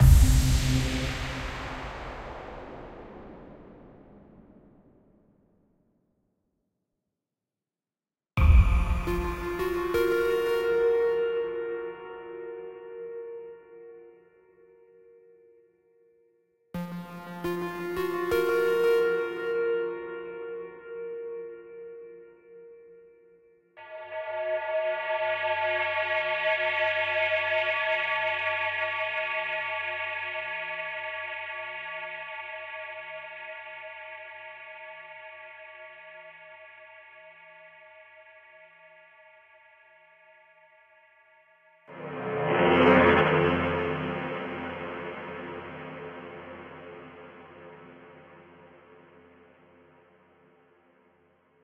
bunch of sounds made in albino ,synth1, massive